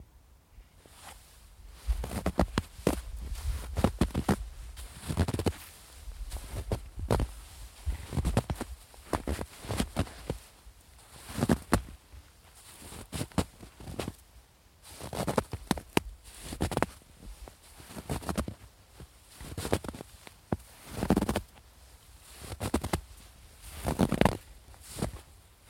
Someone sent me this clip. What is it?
footsteps in snow 1
snow, steps